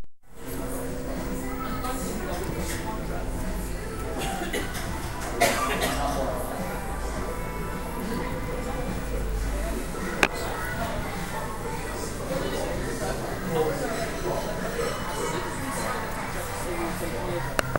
American bar in London at lunch time. Recorded with a mike attached to an ipod.